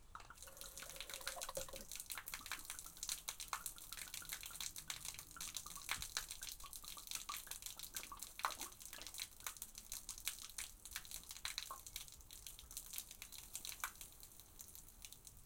Liquid Gurgle Pour Splash FF210
Liquid pour, pouring, glug, on concrete, light, splashing
Liquid, concrete, glug, gurgle, pour